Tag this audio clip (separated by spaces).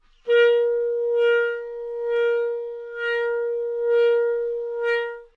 good-sounds neumann-U87 Asharp4 multisample clarinet single-note